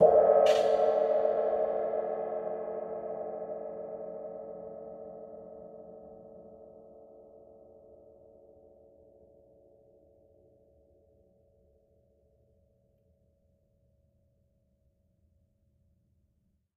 Single hit on an old Zildjian crash cymbal, recorded with a stereo pair of AKG C414 XLII's.
crash, drums, dry, cymbals, splash, cymbal, clean, quality, percussion, zildjian